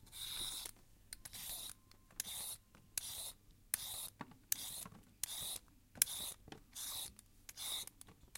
cutting vegetables
cutting some vegetables. recorded with zoom iq6.